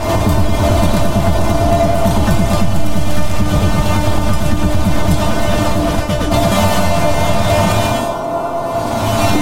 dark sustained loop created with Adobe Audition and Audiomulch
2-bars, ambient, dark, industrial, loop, noise, processed, sound-design, sustained